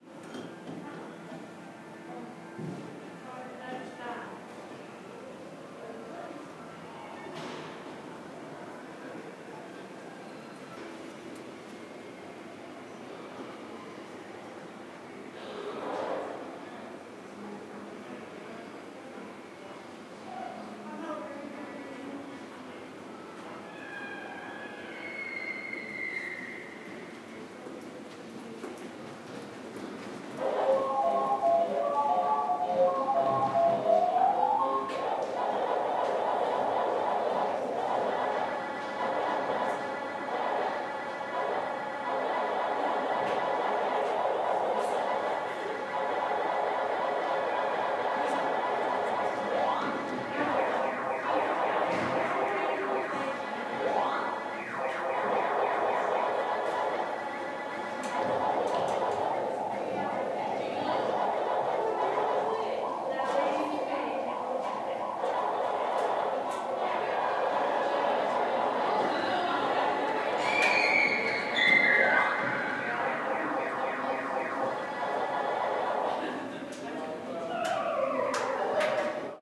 Arcade Zone Atmosphere
Environment in the empty arcade zone. Some pacman sounds in the end.